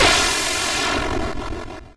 plane crush
Just some sounds I generated with Dr. Peter's excellent SFXr proggy
video-game, cartoon, retro, arcade, crush, nintendo, heavy, noise, 8bit